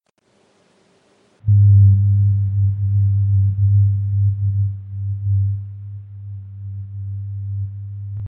Created by recording my humming and lowering the pitch on Audacity. Can be used for ambience.